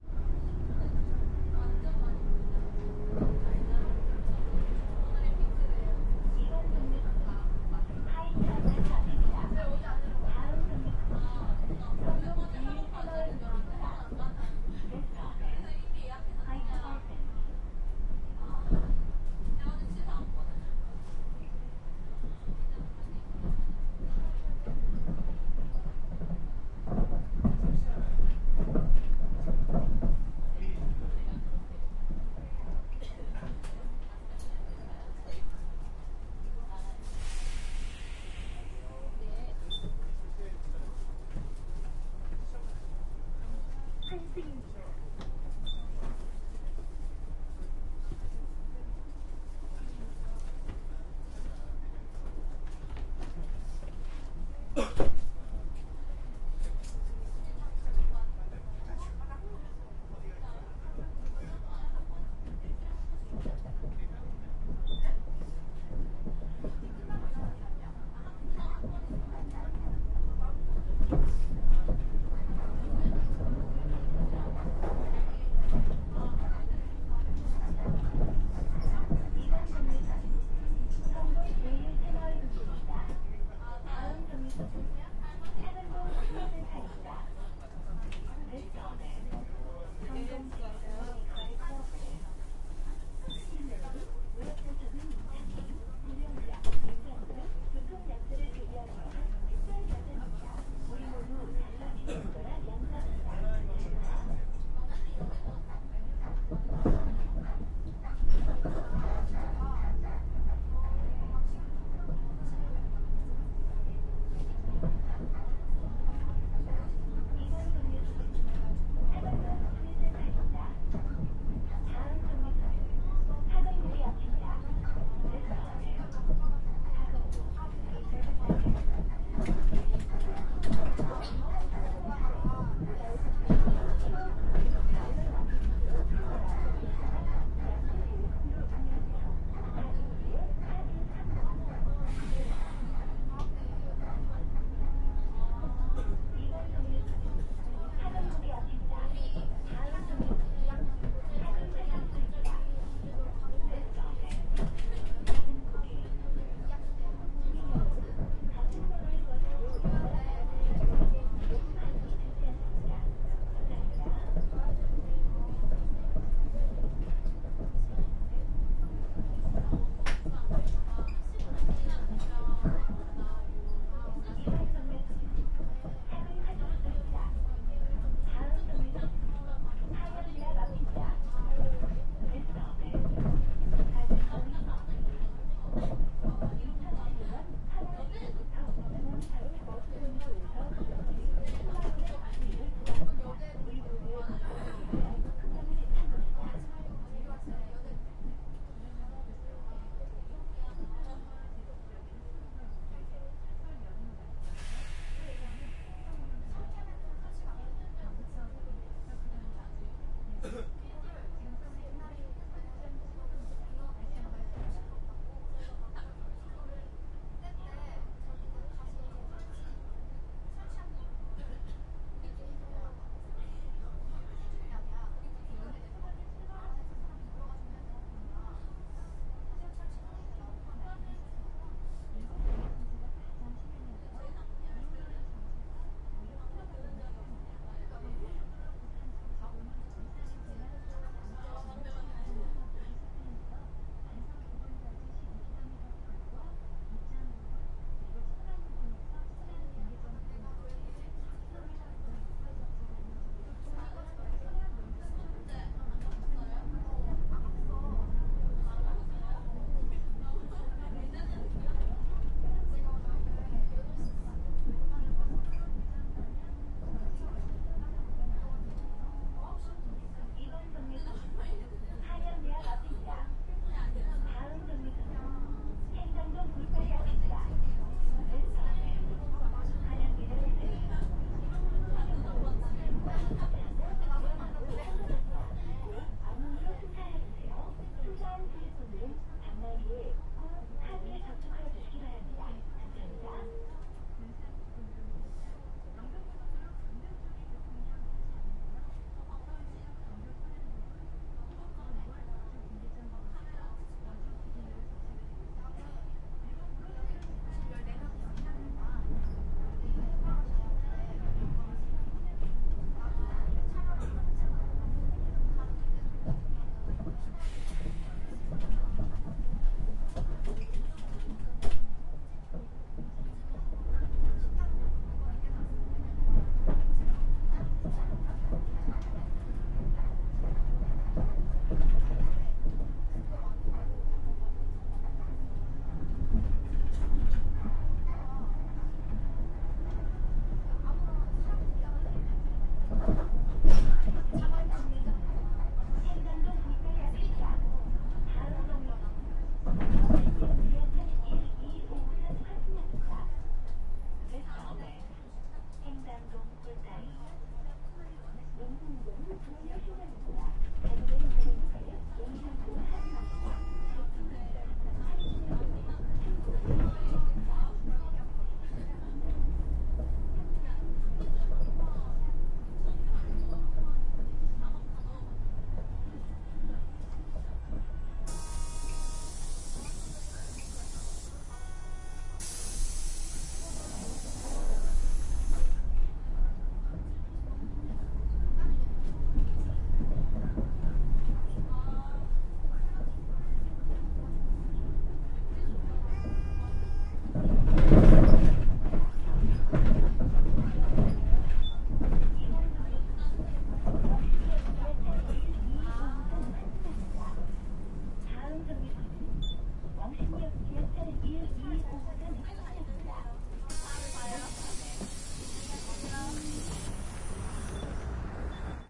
0118 City bus trip
City bus trip for some minutes. People talking, Korean. Beep ticket machine. Speaker with info Korean English
20120121
bus, english, field-recording, korea, korean, ticket